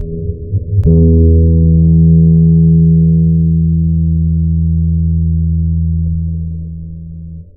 guitar open E Reverse reverb
this is an open E guitar string. I reversed it, applied a bunch of filters, and then "re-reversed" it.